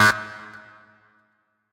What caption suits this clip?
Sine waves processed randomly to make a cool weird video-game sound effect.